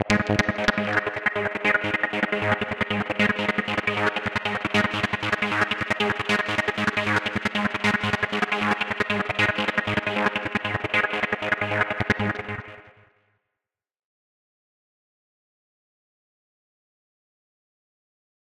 gap filla
synth riff i created to "fill the gaps" in my hard trance track
synth, loop, hard, trance, processed